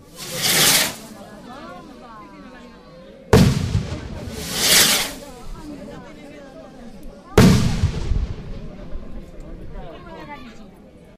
People talking, eating and drinking, occasional fireworks.
banter, crack, party, people, rocket, village, woosh